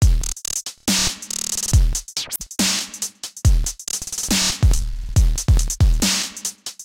dubstep drumloop crunch glitch 140BPM
A dubstep drumloop created in FL Studio.
I hope you enjoy!